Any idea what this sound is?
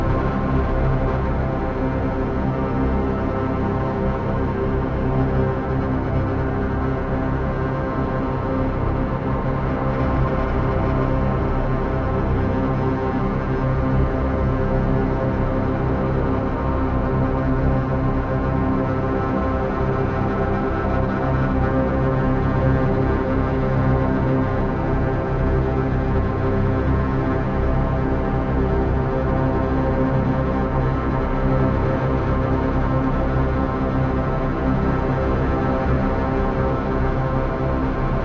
Loopable Gritty Ambient Sound. Created using granular synthesis in Cubase 7.